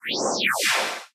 Another interesting processed noise.
electric, processed, sound, electronic, sample, bizarre, whew-whoosh, swoosh, sound-effect, artificial, fx, noise, sci-fi, futuristic, machine, random-sound, freesampler, effect, shew, whoosh, whew